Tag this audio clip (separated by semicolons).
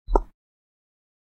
feet; field-recording; foot; footstep; footsteps; step; steps; stone; walk; walking